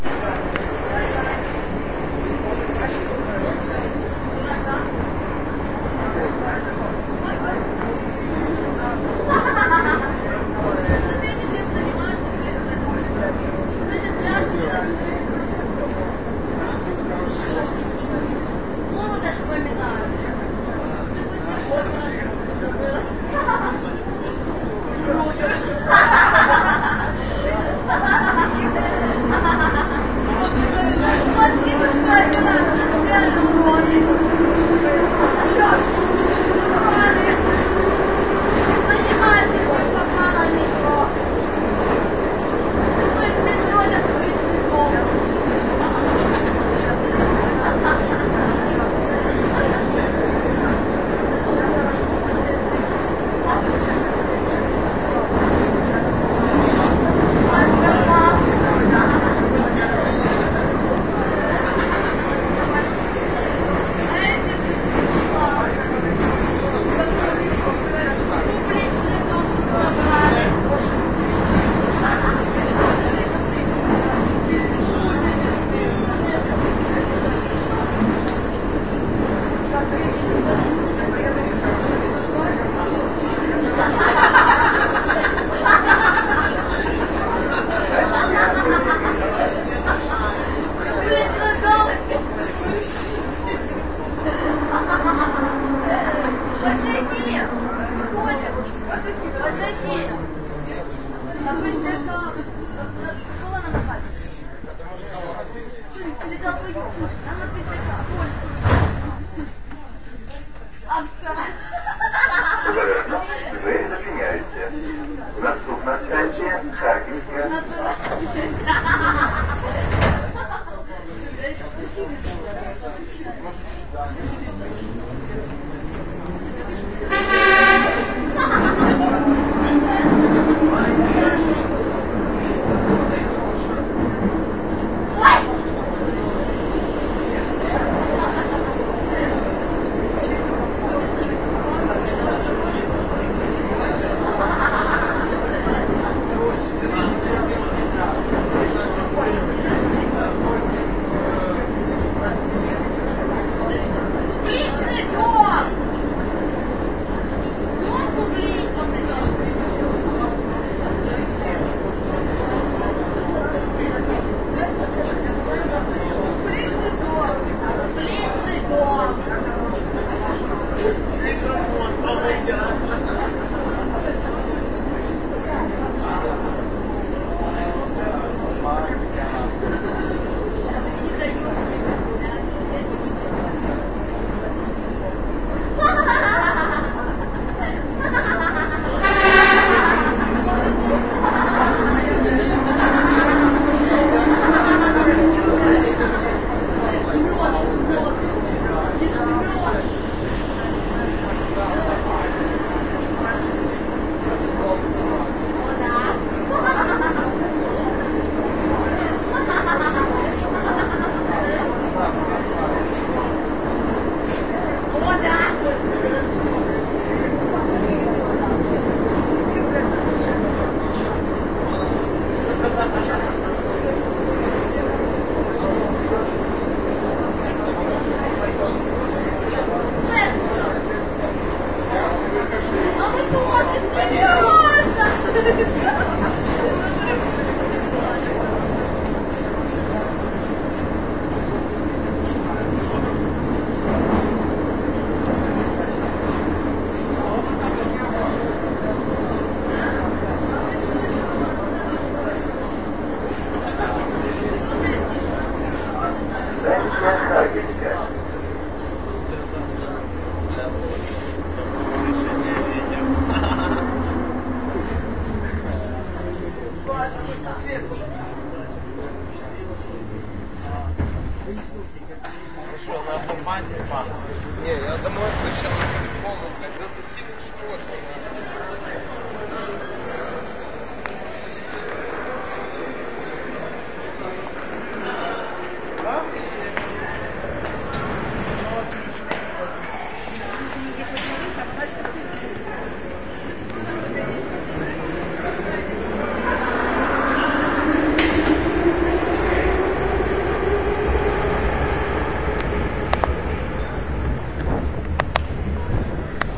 Kiev subway, 0:00 (night)and tipsy voice girls and boys!
drunk, kiev, laugh, night, people, subway, travel